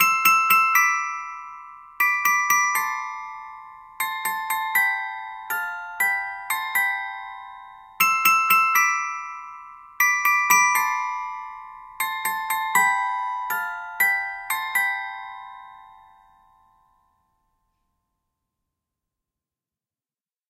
xmas bellis1
Especially for Christmas. These sounds are made with vst instruments by Hörspiel-Werkstatt HEF